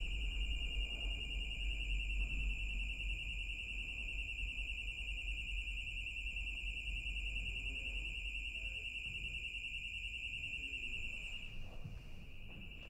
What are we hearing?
Alien Air conditioner
Found this air vent on a location shoot. The vent was making a strange chirping noise... like an alien cricket.
Recorded with a Tascam DR680 and Sennheiser MKH-416.
air, alien, chirp, crickets, effect, experimental, high-pitch, horror, vent